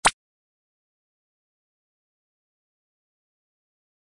Recorded from a old phone and remixed it using Vegas.
sound, Notifications, Notification